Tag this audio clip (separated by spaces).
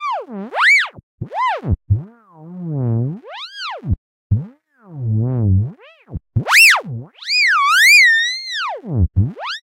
cartoon funny